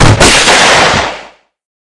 hit explosion
A bullet hitting something explosive.